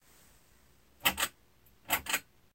Light string
The sound of a pull string light being turned on and off
click, light, pull, string